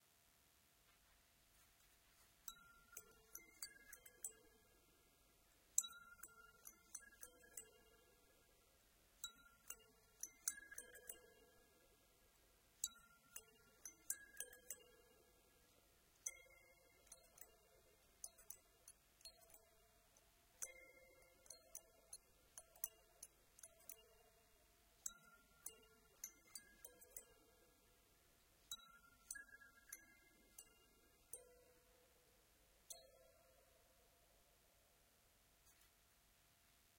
Recording of a Hokema Kalimba b9. Recorded with a transducer attached to the instrument and used as microphone input with zoom h2n. Raw file, no editing.
filler, instrumental, kalimba, loops, melodic, thumbpiano